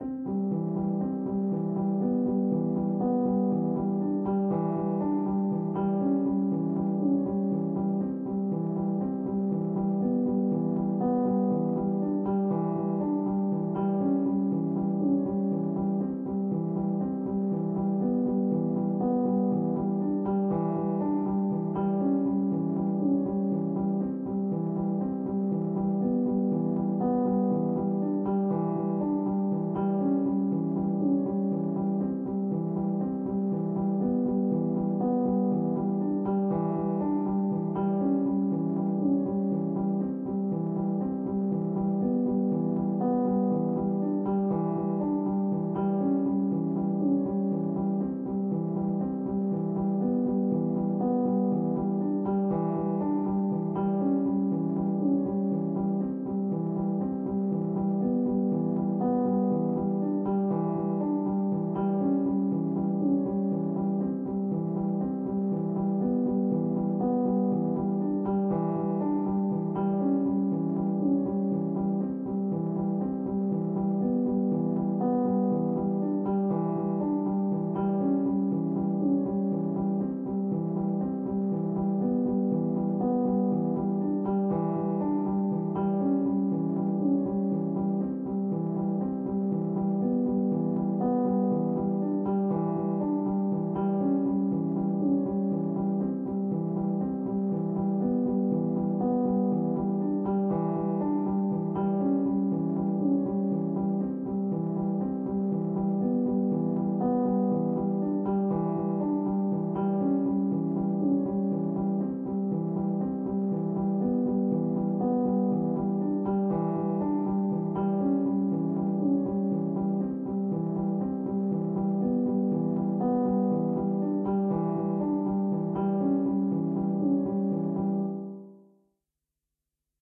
Piano loops 031 octave down long loop 120 bpm
120, 120bpm, bpm, free, loop, music, Piano, reverb, samples, simple, simplesamples